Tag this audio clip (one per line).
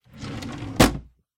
Empty
Drawer
Wood
Open
Wooden